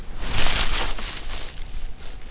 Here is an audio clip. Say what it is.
Creaking a twig. Recorded with Zoom H4.